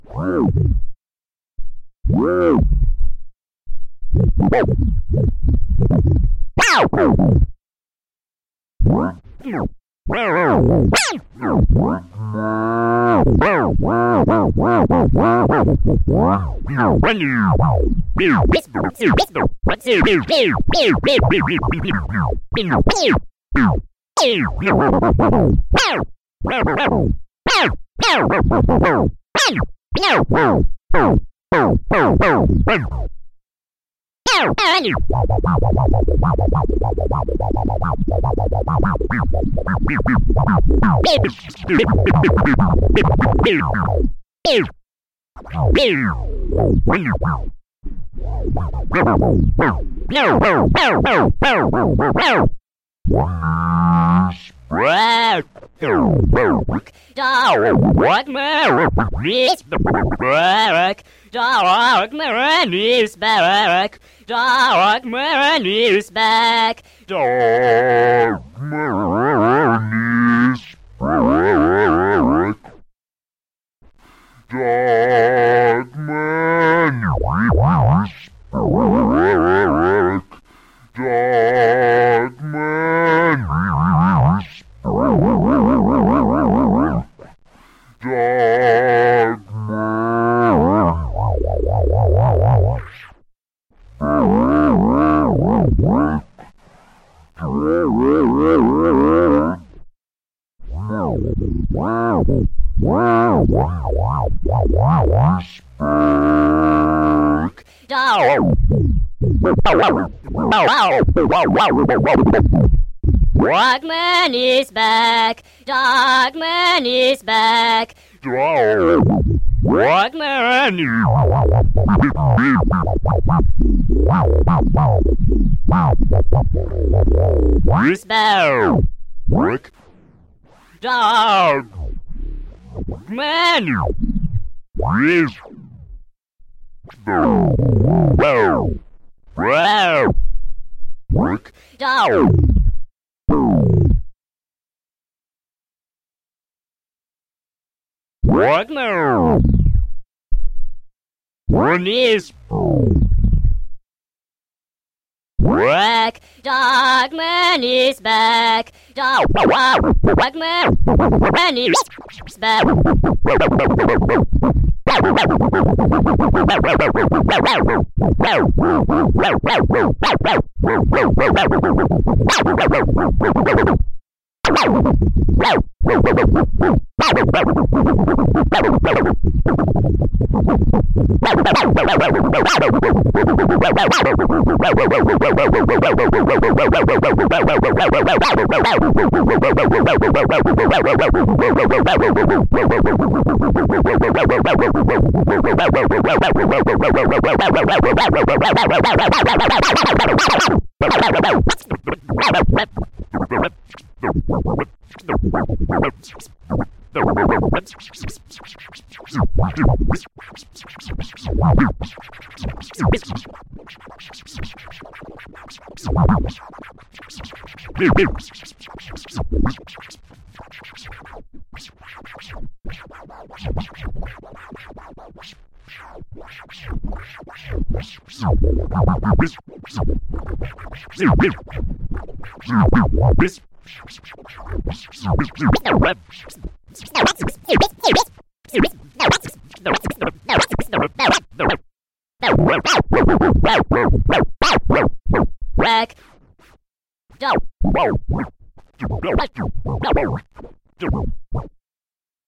One that escaped the folder, but I found it hiding on my desktop amongst the scattered icons. Made in analog x via direct to disk render, this monophonic attempt at advanced scratching technique brings the noise of vinyl from the late 1990's.
scratch, scratching, tunrtable, turntablism, vinyl